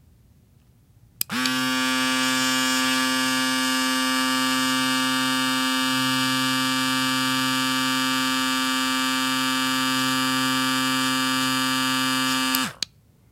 machine sounds 3 (electric razor 2) 06
A recording of an electric razor my friend and I made for an audio post project